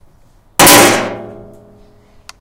Metal on metal.
clang, dong, metal, metallic, ping, ring